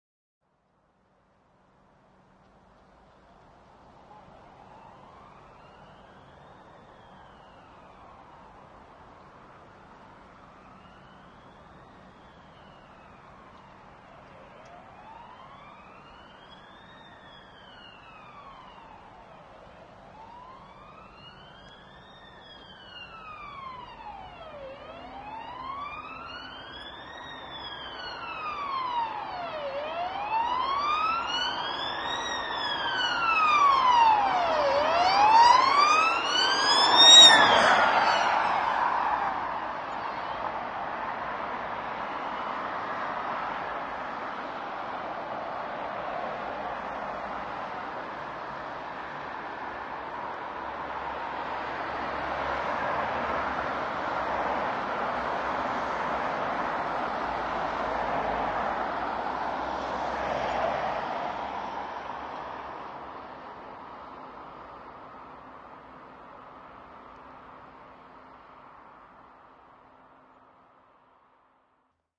ambulance siren
This is one of two files that I recorded on the 18th Jan 2009 at around 8.00pm at the main road outside my house. The weather was cold and very windy. The geotag has been logged with the file. Recorded onto a domestic, hand-held mini disc recorder (Sharp MD MT80HS). The files have have not been post produced, they are exactly as recorded.
This is the first attempt I have made with my new, home-built, stereo imaging microphone, built for less than £20. For those of you who may be particularly interested in making one of these, the following description may help you to experiment with your own devices.
I bought a fairly cheap Sony, stereo 'lapel mic' which I mounted onto a 400mm length of doweling wood with insulation tape. This looked like an extended 'letter T'.
ambulance, british, english, field-recording, siren, stereo, street, traffic, urban